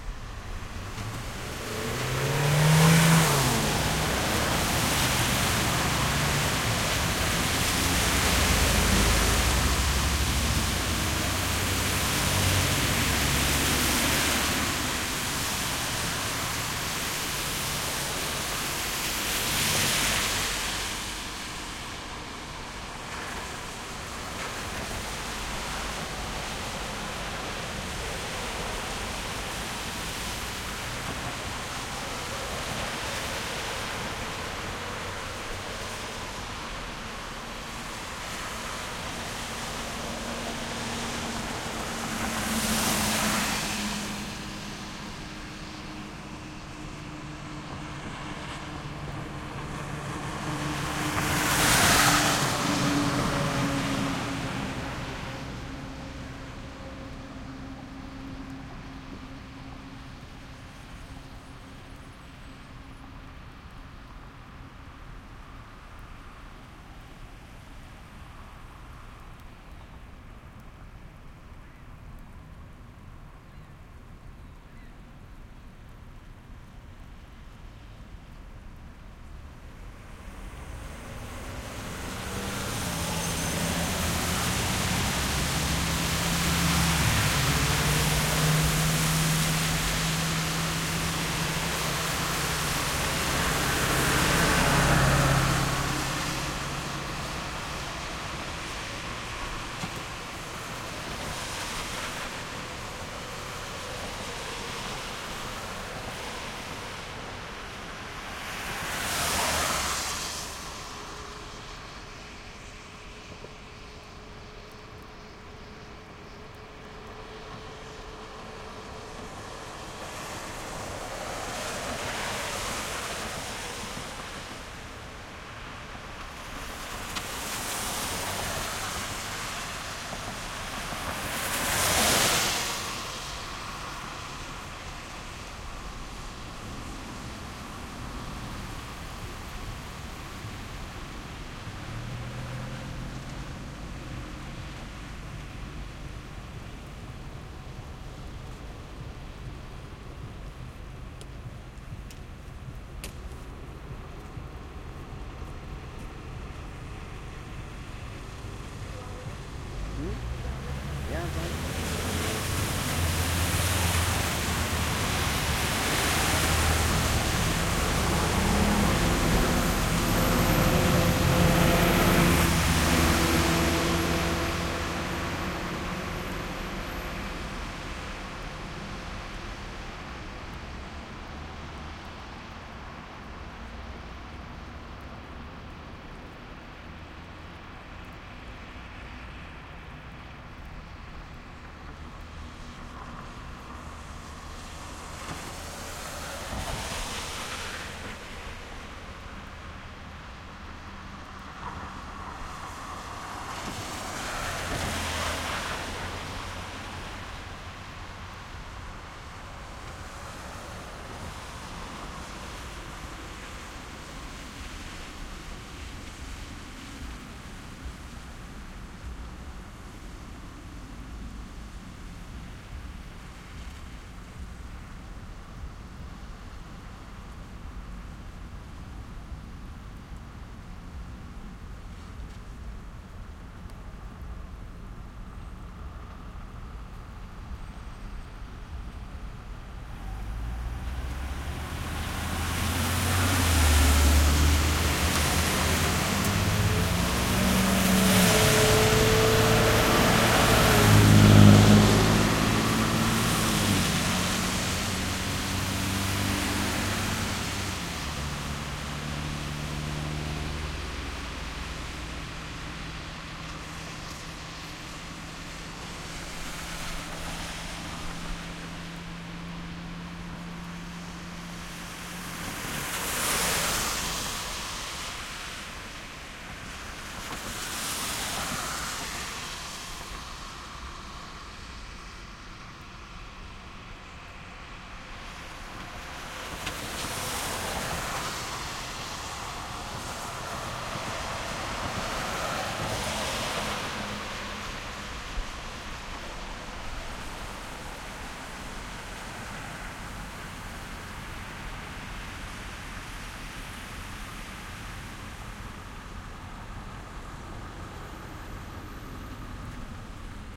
traffic medium cars trucks pass wet rain close2
cars, close, medium, pass, rain, traffic, trucks, wet